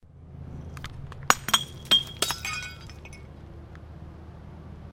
Glass Smash 5
One of the glass hits that I recorded on top of a hill in 2013.
I also uploaded this to the Steam Workshop: